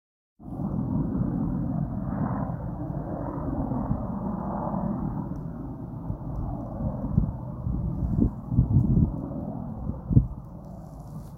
Apache helicopter flyover , windy day